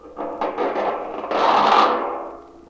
Mesh Basket 1
More short hits fall and then a short scrape. Another pretty useful sound.